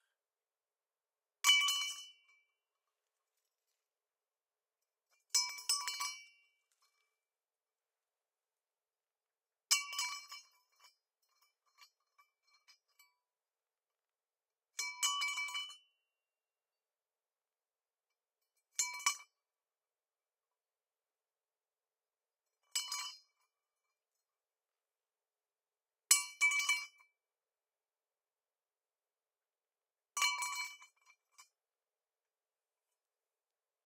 Steel, hollow pipe on concrete, 8 impacts, 400Hz High-Pass
Dropping an aluminium pipe on a concrete floor.
As it was outdoors i applyed a high pass filter at 400Hz
No other editing
Recorder: Zoom H6 with XY capsuel
blacksmith, clang, concrete, foley, hit, impact, iron, metal, metallic, pipe, rod, steel, strike, ting